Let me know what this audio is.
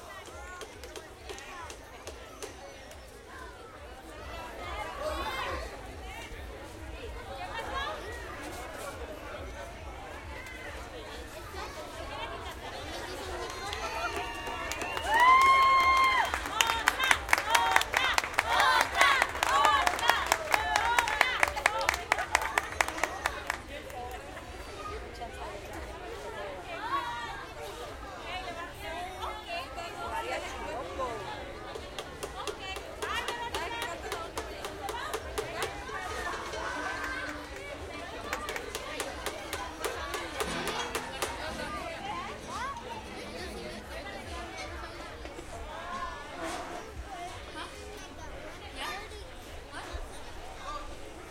crowd ext cheer encore spanish
encore, spanish, ext, crowd, cheer